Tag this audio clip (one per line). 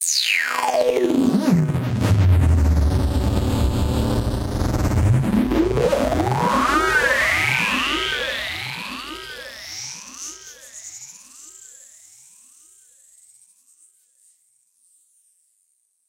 sweep
sfx
fx
acid
electronic
synth